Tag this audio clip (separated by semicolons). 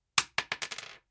bullet; drop; dropping; floor; shell